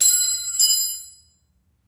Ooops, dropped a small spanner on the concrete floor of my garage.